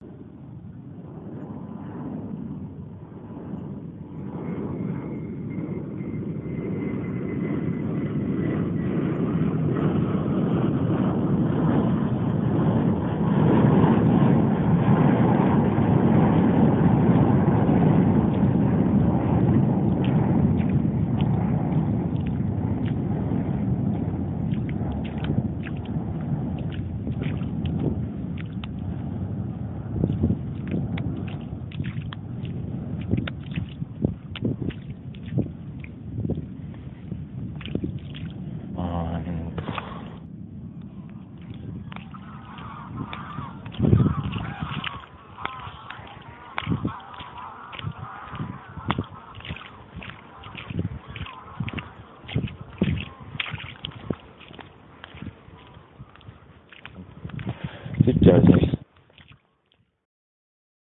David O vastness audio
EMiLA, Field, recordings, Tasks